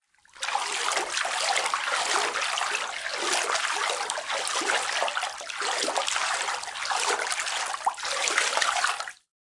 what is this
Bathtub LongWaves 1
Longer water waves and splashing recording in home bathtub.
bath, splash, water, wave